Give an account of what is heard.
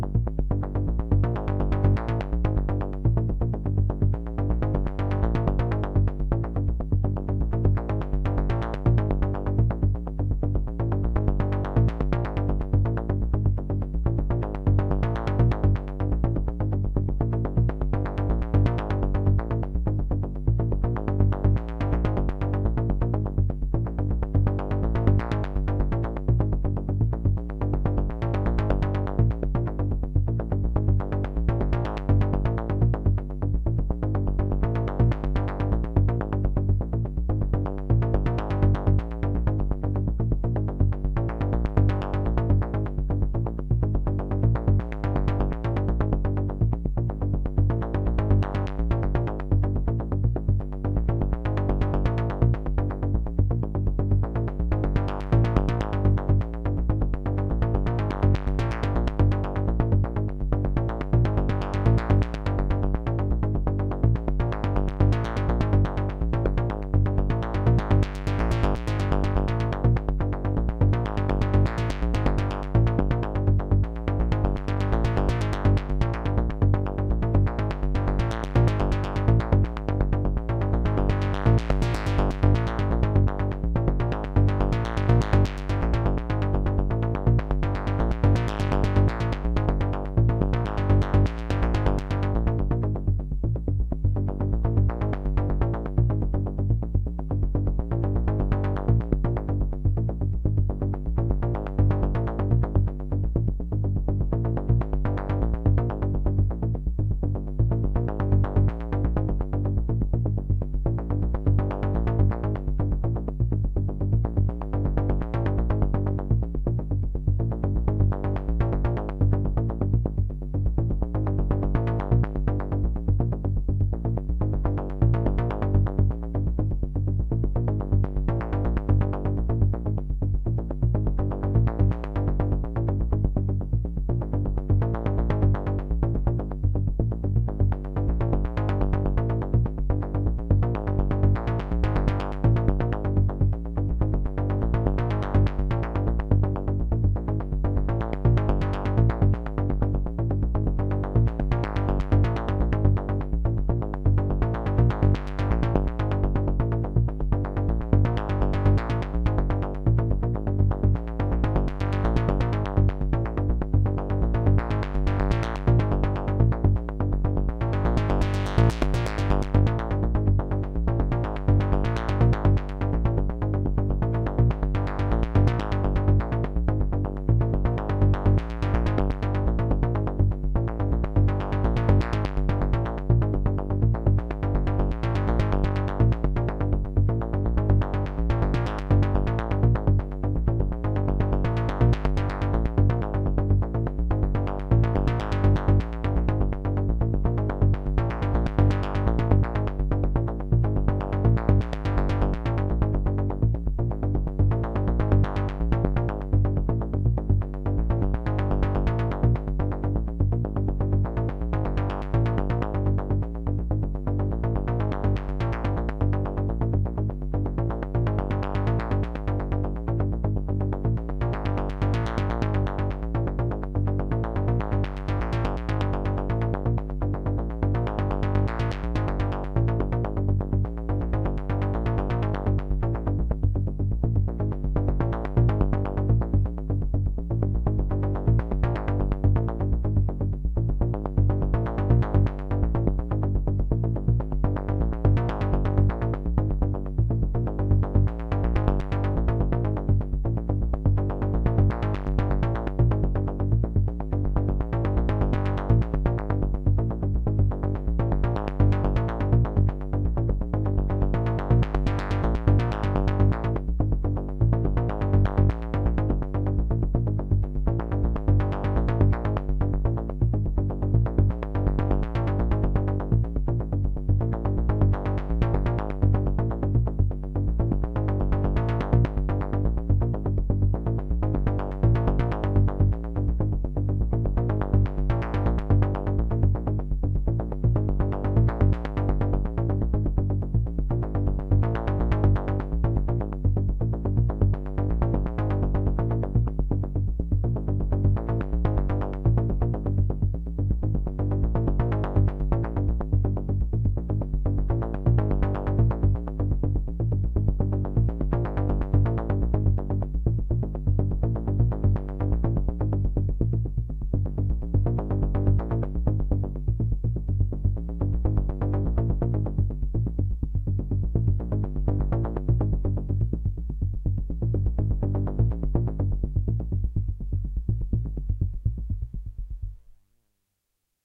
Drones and sequences made by using DSI Tetra and Marantz recorder.
Analog, Sequencer, Tetra